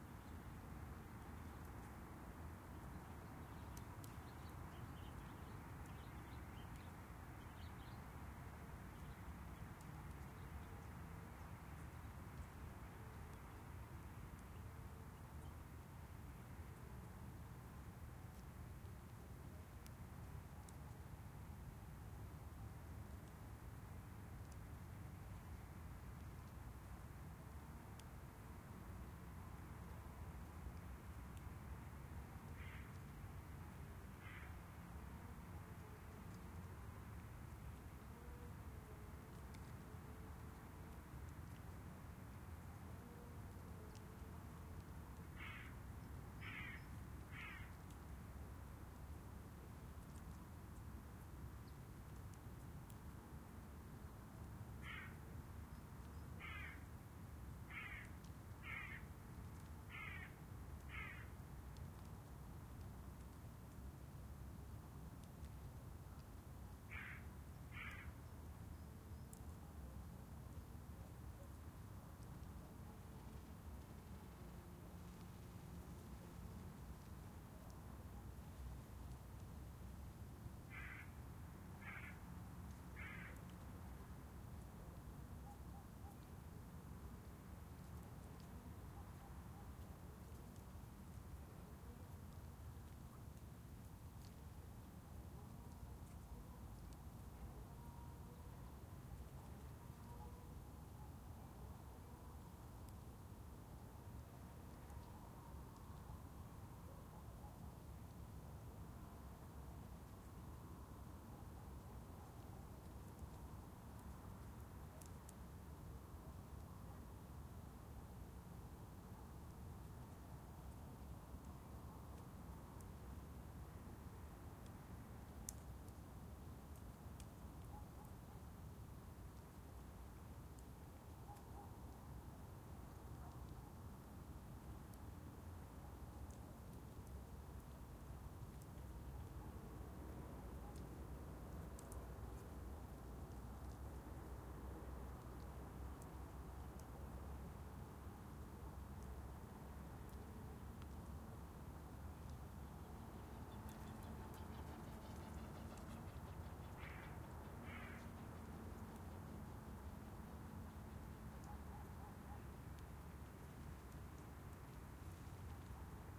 Country side winter evening ambience. Imagine the land and the trees white with frost, temperature -6°C, no wind only a few crows and other birds and far away dogs barking through the foggy landscape. Notice the sound of a bird flying overhead towards the end of the recording. Like my CountrySideWinterEvening01 recording a very quiet atmosphere. MS recording using a Sennheiser MKH60/30 microphone pair on a Sound Devices 702 recorder. Decoded to L/R stereo at the recorder stage.